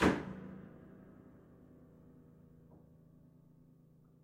closed lid
Closing lid of Piano in Garage.
lid, Garage, Closing